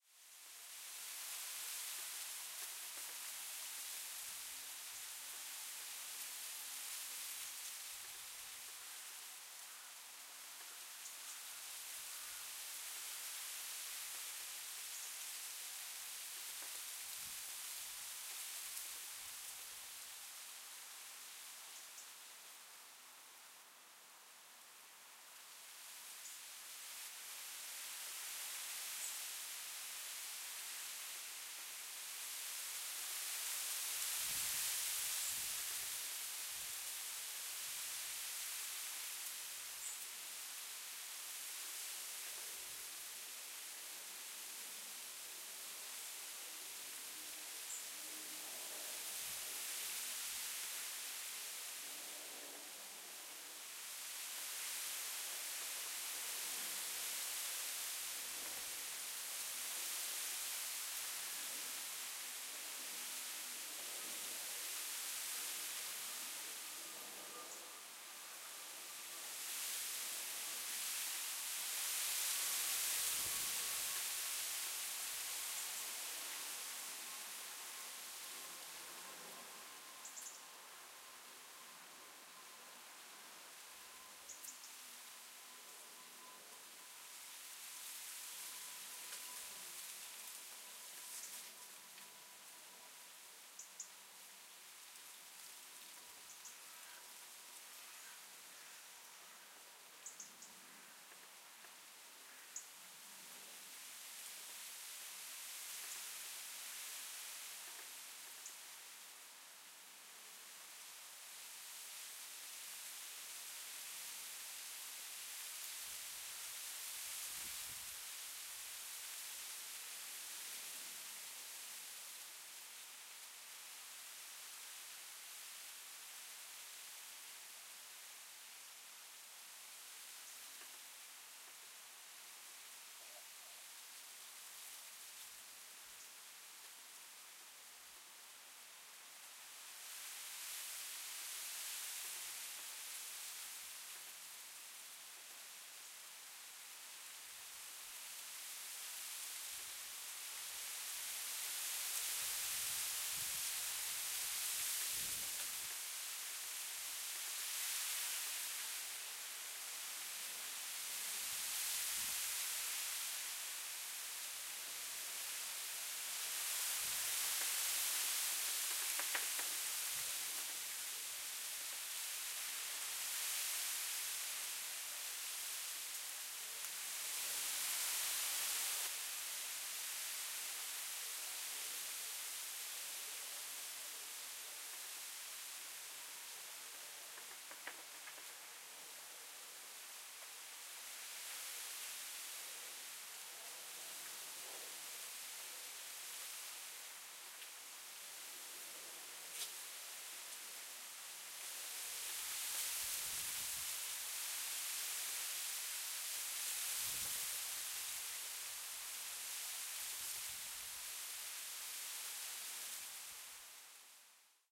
Wind Blowing Leaves in Tree
Wind blowing the leaves of a tree recorded using a Zoom H5 mounted on a tripod. The recording was made on the morning of 12th October, 2018 shortly before dawn.
weather, wind